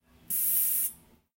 foley for my final assignment, vapor-esque noises
vapor, mechanic